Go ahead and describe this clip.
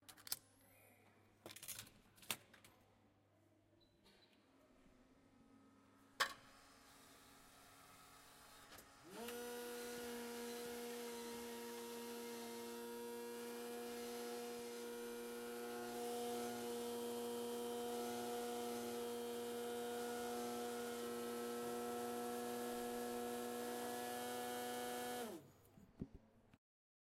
20141119 coffee machine H2nextXY
Sound Description: cup output / coffee machine
Recording Device:
Zoom H2next with xy-capsule
Location: Universität zu Köln, Humanwissenschaftliche Fakultät, #216, ground floor
Lat: 6.920556
Lon: 50.934167
Date record: 2014-11-19
record by: Stoffel and edited by: Stoffel/Pettig/Biele/Kaiser
2014/2015) Intermedia, Bachelor of Arts, University of Cologne
coffee-machine, Cologne, Field-Recording, machine, University